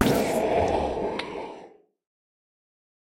Shadow Spell Dark Magic
dark, evil, magic, magical, magician, rpg, shadow, skill, spell, witch, wizard